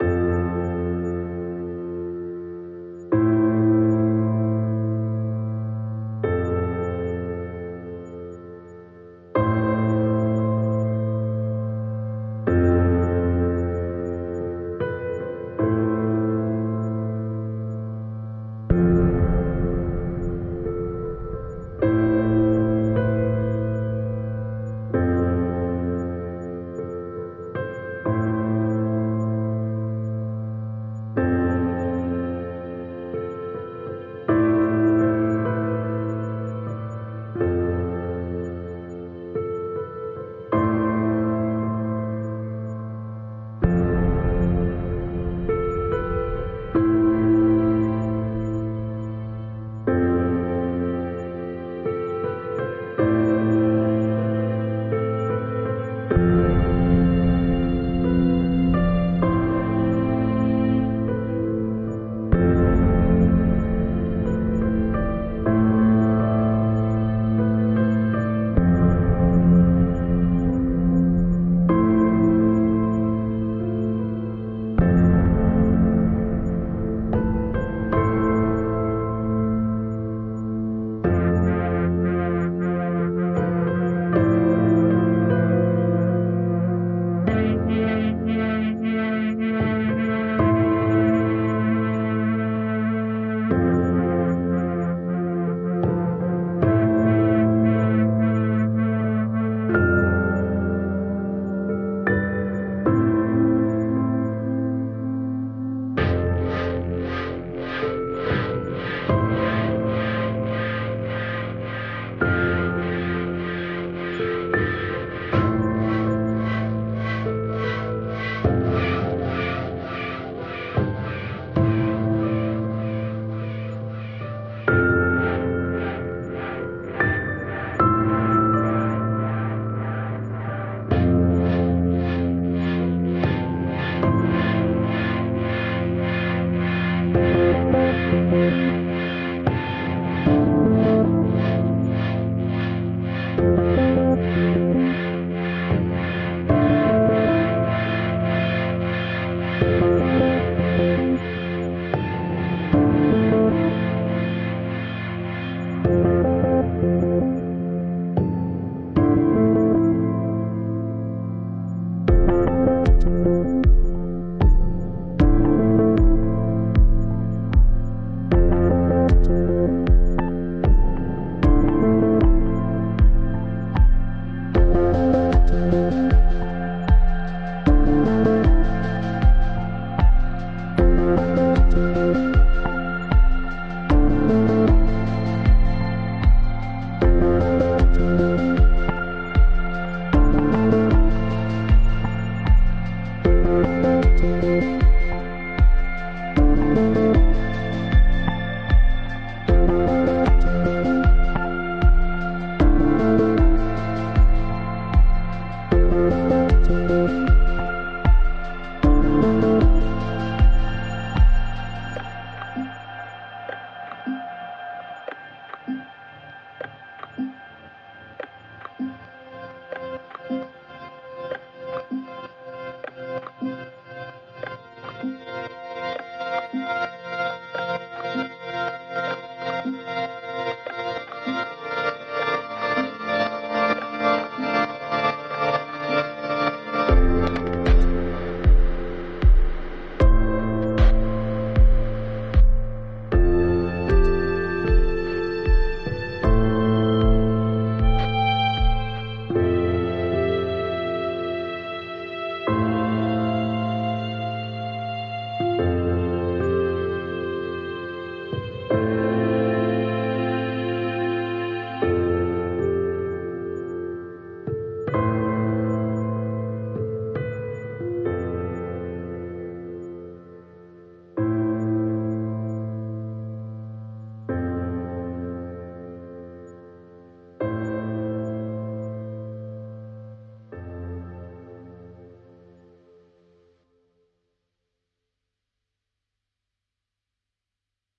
Silent Sex - Ohnmacht
cheesy, andevenmorereverb, synth, piano, reverb, tonsofreverb, neoclassical, wannabenilsfrahm, drums